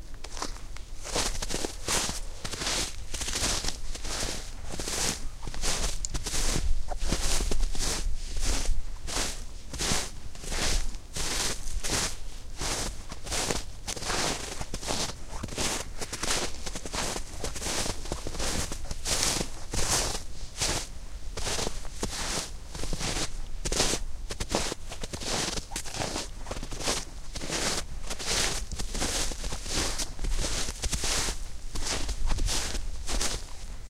walking in snow
Just footsteps in snow. It's me, on my way to set some recording gear in a nearby shed.
Recorded with a TSM PR1 portable digital recorder, with external stereo microphones. Edited in Audacity 1.3.5-beta
cold, footsteps, moving, snow, walking, winter